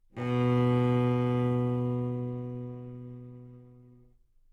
Cello - C3 - bad-dynamics
Part of the Good-sounds dataset of monophonic instrumental sounds.
instrument::cello
note::C
octave::3
midi note::36
good-sounds-id::4344
Intentionally played as an example of bad-dynamics
C3, cello, good-sounds, multisample, neumann-U87, single-note